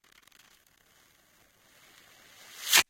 Queneau Frot 06
prise de son de regle qui frotte
piezo, frottement, cycle, clang, steel, metal, rattle, metallic